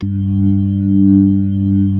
real organ slow rotary